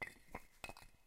Sound of rocks or stones grating against each other, with reverb, as the sound someone walking in a cave might make with their footsteps.
cave, rocks, stones